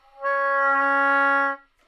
Oboe - C#4 - bad-attack-air
Part of the Good-sounds dataset of monophonic instrumental sounds.
instrument::oboe
note::C#
octave::4
midi note::49
good-sounds-id::8023
Intentionally played as an example of bad-attack-air
Csharp4, good-sounds, multisample, neumann-U87, oboe, single-note